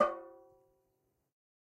Metal Timbale left open 025
drum, garage, god, home, kit, real, record, timbale, trash